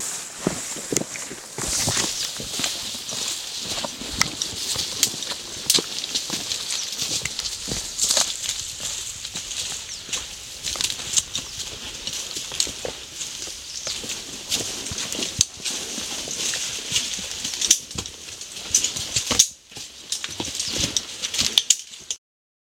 two man walking thou the bushes in madagascar